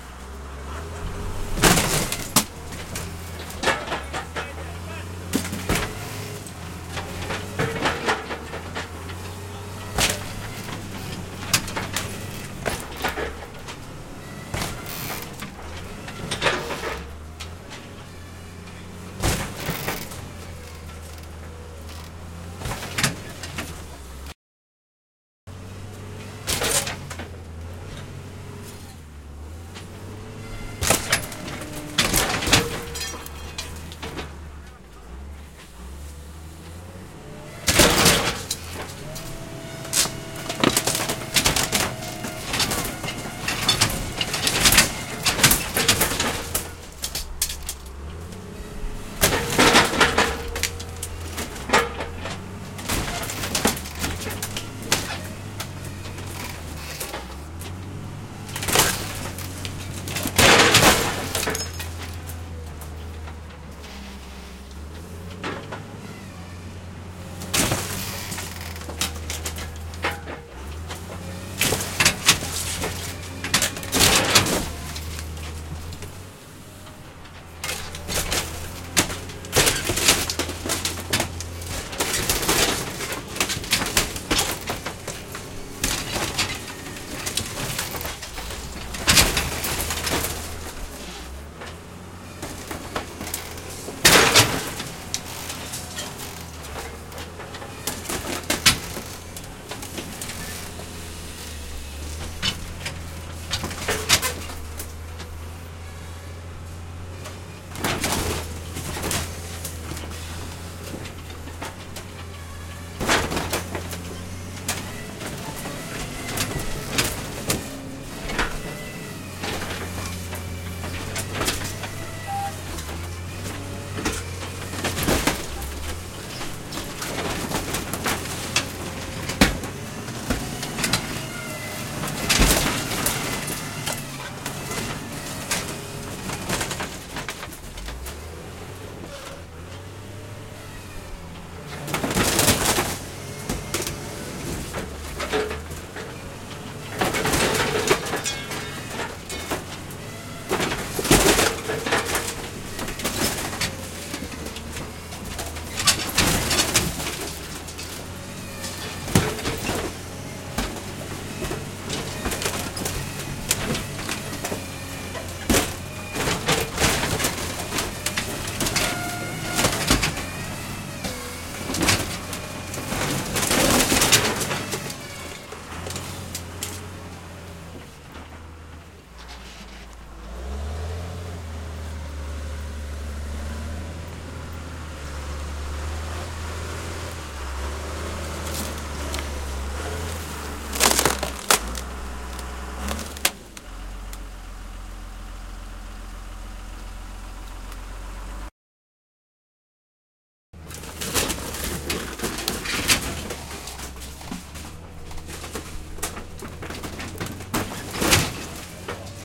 school bus truck destroy crush smash window break metal bend wreck crunch with forklift yes a forklift because why not
window, school, truck, break, destroy, metal, wreck, bend, smash, crush, bus, forklift, crunch